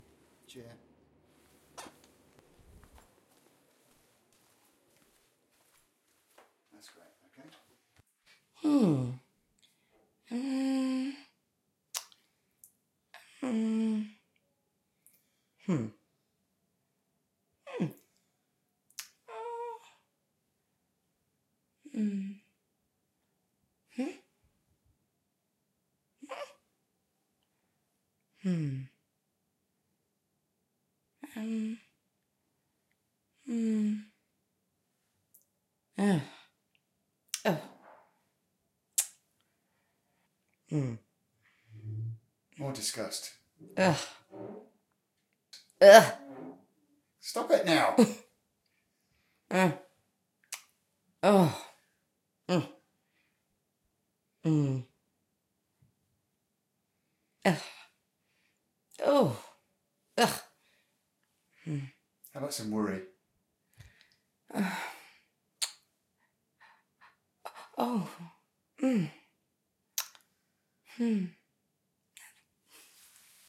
Mindy Sounds
A woman makes several non-verbal sounds for the following emotions, curiosity, disgust, confusion. concern
Recorded for a short film using a Zoom in a small sound treated room, slight flutter echo.
noises, woman